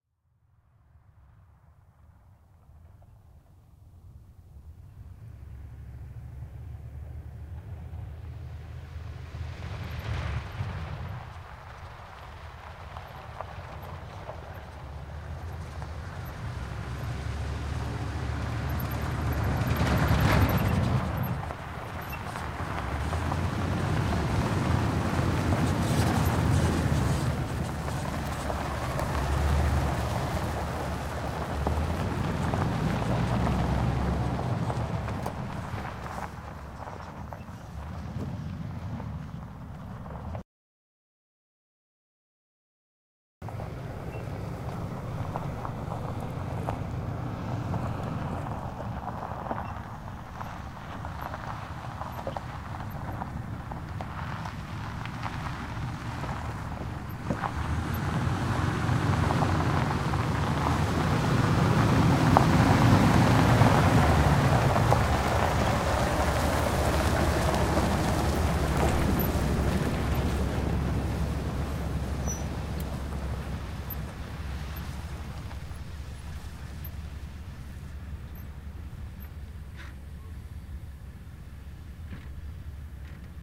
truck pickup pull up long and stop on gravel
truck, up